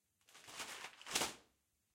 Throwing away a newspaper.
{"fr":"Jeter un journal","desc":"Jeter un journal en papier.","tags":"papier page feuille journal jet"}